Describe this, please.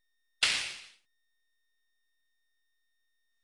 palillo de diente alienaacajacutr
snare-procesed, glitch